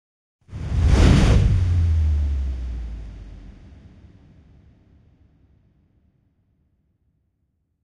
sweep form wave reverse and sub main with EQ, Reverb and saturn plugin
sound, movie-fx, wave, effect, horror, sweep, sub, jingle, sound-effect, bass-sub, soundboard, bass, fx, sweeping